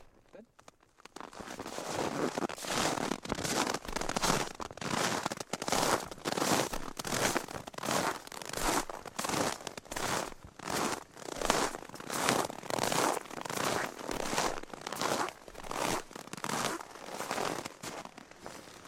footsteps boots heavy crunchy squeaky snow
crunchy, boots, snow, heavy, squeaky, footsteps